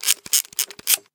one use camera load1
load of a one use camera.recorded with sm 58 mic in mackie vlz and tascam da 40 dat.
one-use, load, camera